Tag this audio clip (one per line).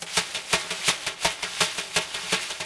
drums
sounddesign
reaktor